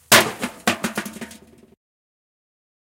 Architecture shapes and constrains the acoustical properties of sound. The built environment allows, within limits, particular variables that the adventurous field recordist can seize on to manipulate in the recording process. To think the built environment as a terrain of experimentation is one step towards denaturalizing the order of things and imagining another world.
Trash Can Echo #1 is a recording of the same trash can, in the same area, as 'Trash Can Roll.' It was recorded with a Tascam DR100. The difference, however, lies in the placement of the microphone. For this recording, the mic was held several meters away from the trash can, in the corner where the echo resounded loudest.
drum-kits, field-recording, found-sound, sample-pack